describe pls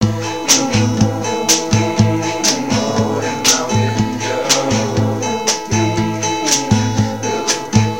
WINDOW1 Mixdown
A collection of samples/loops intended for personal and commercial music production. For use
All compositions where written and performed by
Chris S. Bacon on Home Sick Recordings. Take things, shake things, make things.
acapella, acoustic-guitar, bass, beat, drum-beat, drums, Folk, free, guitar, harmony, indie, Indie-folk, loop, looping, loops, melody, original-music, percussion, piano, rock, samples, sounds, synth, vocal-loops, voice, whistle